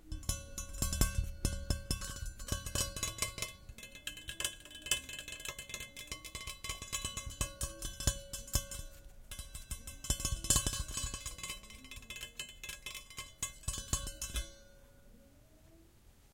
Fingernails on trumpet bell (trumpet in C)